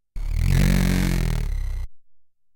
A deep sound - not sure which number it is
vocal, bent, circuit, glitch